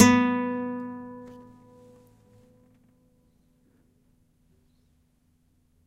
Guitar Nylon Open - B4
Nylon string guitar, plucked open string.
nylon-string, pluck, plucked, stereo